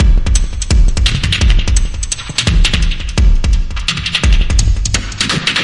Glitch Drum loop 5c - 2 bars 85 bpm
Loop without tail so you can loop it and cut as much as you want.
electronic, percussion